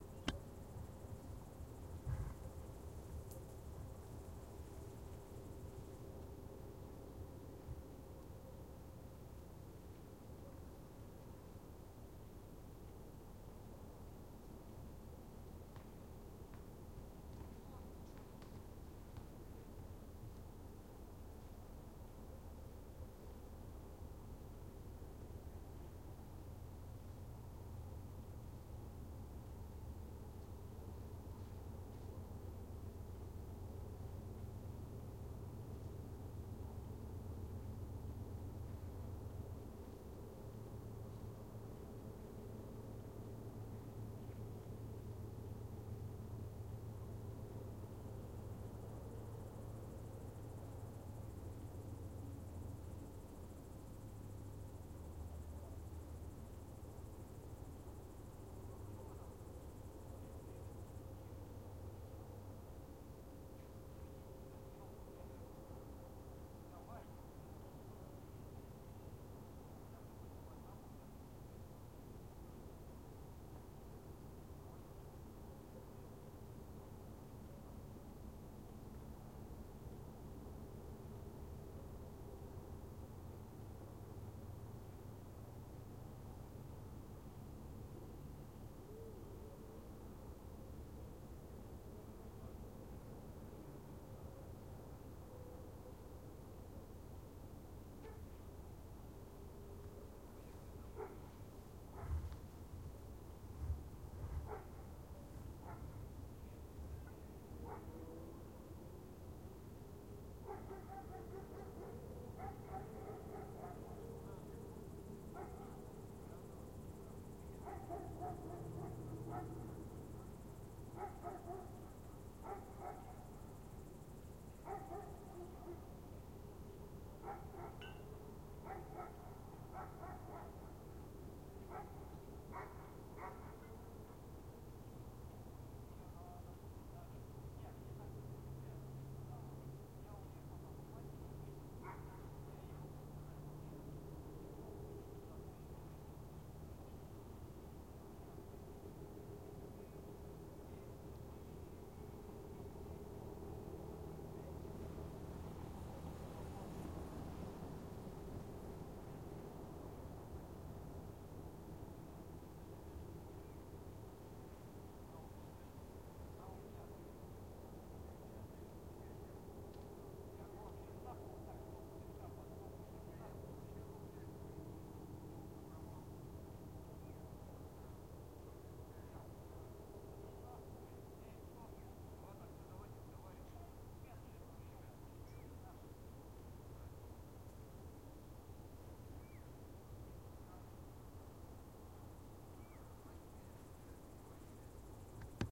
Quiet ambience far from city (3)
This sound is recorded far from Yaroslavl city, on the other coast of Volga river. Nothing happens, evening atmosphere, little bit birds, some cars driving slowly. Distant dogs.